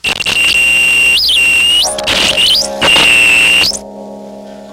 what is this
About 10 years ago my friend gave me a guitar he found in the garbage. This is one of the horrible and interesting sounds it would make. These sounds were recorded originally onto a cassette tape via my Tascam Porta07 4-track. This sound is longer and fluctuates in pitch somewhat and you can hear the strings.
broken, distortion, feedback, guitar, harsh